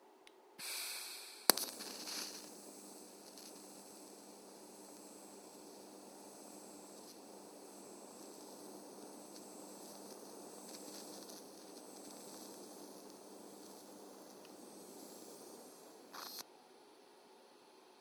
Wameta TIG 1600 used to in electric welding.